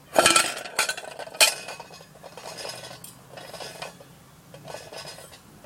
Rolling Can 10
Sounds made by rolling cans of various sizes and types along a concrete surface.
aluminium, can, roll, rolling